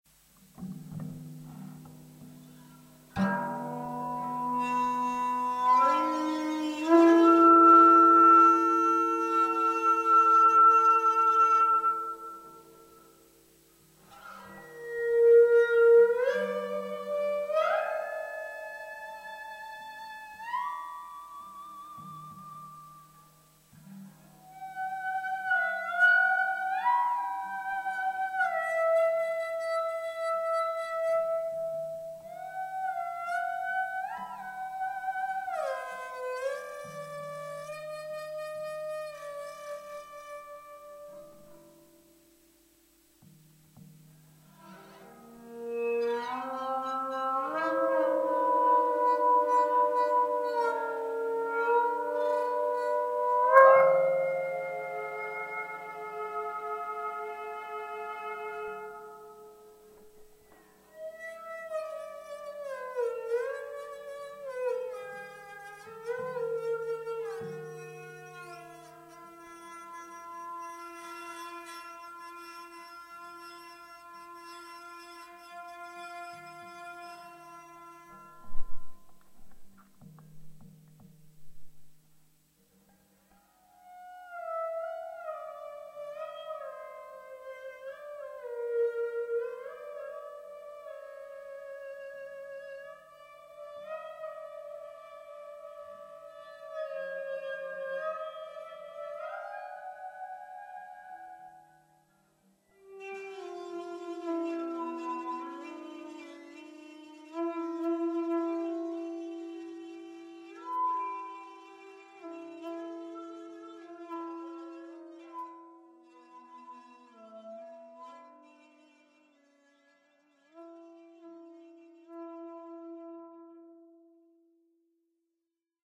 me playing prepared guitar, crappily recorded, completely dry.